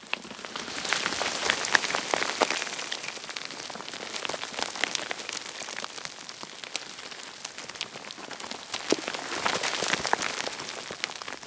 Small stone avalanche caused by dislodging some rocks from an overcrop.
Recorded with a Zoom H2 with 90° dispersion.
avalanche, destruction, fall, rock, rubble, slide, stone